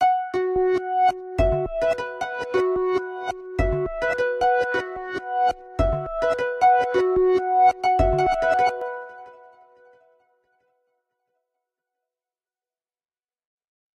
Long Journey Ahead
U Have a long trip ahead for you type sound!
Deep, Far, Walk